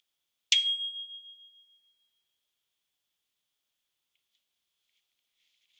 Small Bell
hit, elevator, Bell, small, microwave, ring, Ding